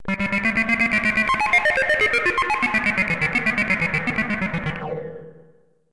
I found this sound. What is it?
robot chat
A 1950s robot enlightening its alien master on the virtues of time travel. or something.
50s, sci-fi